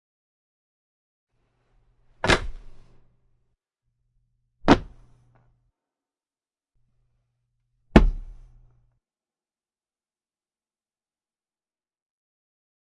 Intestine slap drop
The sound of a piece of meat or intestine landing on a desk after the owner was blown up, and a thump as it lands on a solid floor
drop, land, intestine, slap, meat